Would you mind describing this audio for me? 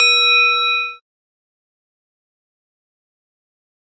bell003mono
A simple short decay bell sound from a small bowl bell.
bell, ding, metal, mono, one-shot, short, tinny